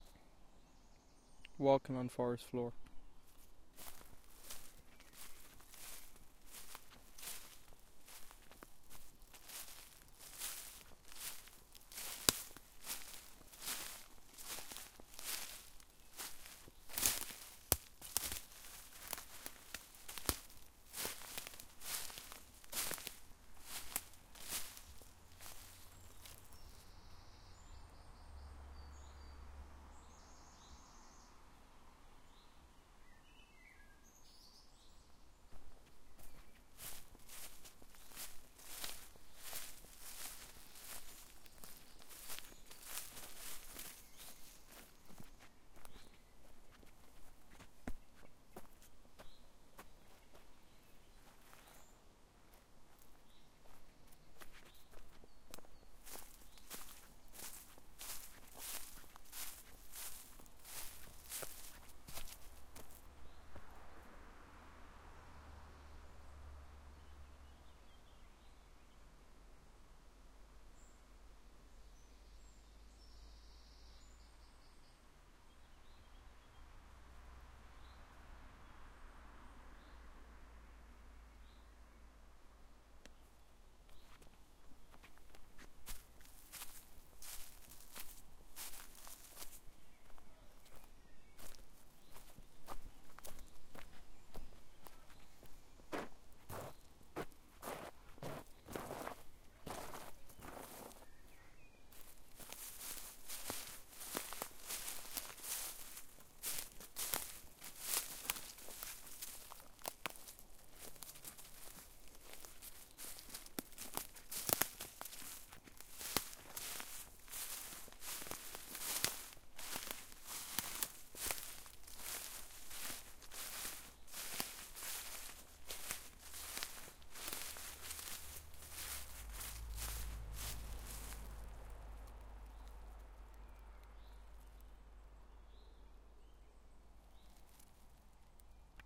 Walking on forest floor
A recording of me walking over a forest floor covered in leaves and twigs.
Drag foot across dirt floor at 1:35.
Birds can be heard throughout and cars in distance. I tried to stop moving when cars could be heard.
Wearing jeans and rubber-soled puma suedes.
Recorded with a Tascam DR-05 during Irish summer
shoes, step, foley, twigs, field-recording, footsteps, walking, footstep, road, birds, engine, cars, leaves, summer, walk, crunch, feet, grass, foot, forest, driveby